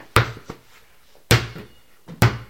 anahel balon2 2.5Seg 8
bounce, ball, bouncing